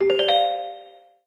Part of a games notification pack for correct and incorrect actions or events within the game.
Thanks for stopping by!